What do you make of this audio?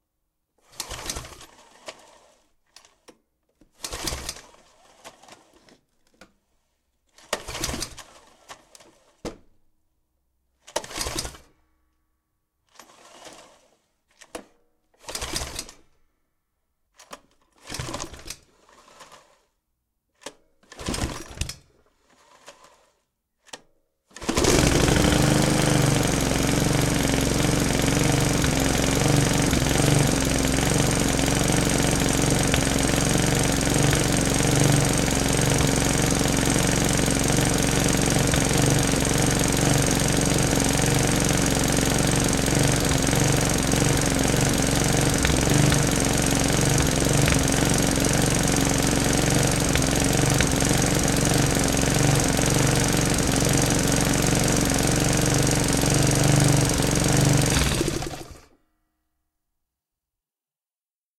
mower with failed start
Small mower being started, run and stopped.
lawn-mower, sputter